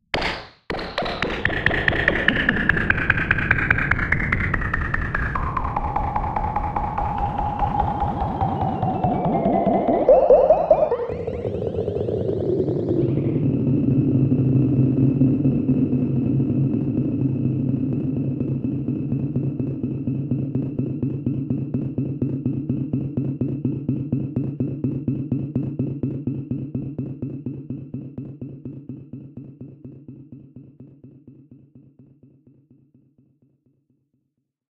Wet Cork Membrane
The sound of a cube of alien cork bouncing on a membrane.
bubble, cork, electronic, experimental, pop, resonant, snap, synth